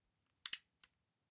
Gun cocking 10
Cocking a smaller revolver. recorded with a Roland R-05
cock, cocking, gun, revolver